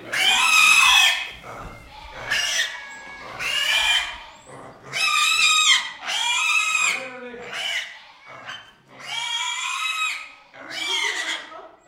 not for the faint of heart, young pigs' slaughter. Recorded in a country house's open yard near Cabra, S Spain. Sennheiser ME66 + MKH30, Shure FP24 preamp, Edirol R09 recorder. It was very hard for me to record this so I hope it's any use (should be hard to synthesize, I guess...)
EDIT: I feel the need to clarify. This is the traditional way of killing the pig in Spanish (and many other countries) rural environment. It is based on bleeding (severance of the major blood vessels), which is not the norm in industrial slaughter houses nowadays. There stunning is applied previously to reduce suffering. I uploaded this to document a cruel traditional practice, for the sake of anthropological interest if you wish. Listeners can extract her/his own ethic/moral implications.
death, meat, field-recording, slaughter, butchering, suffering, horrific, squeal, scream, animal, pig, nature